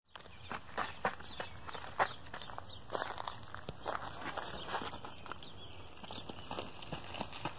tlf-walking running gravel 03
Running on gravel
ambience, bird, birds, birdsong, field-recording, gravel, nature, outdoors, running, singing, trail